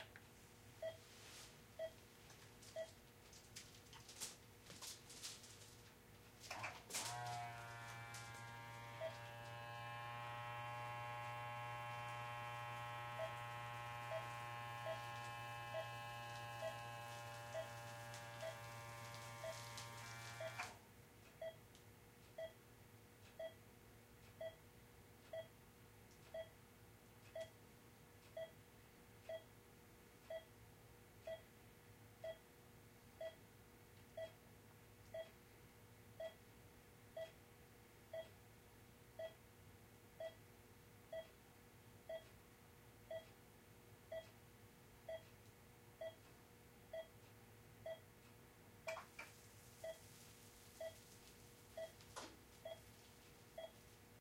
The complete cycling of an automatic blood pressure machine
hospital, machine, pulse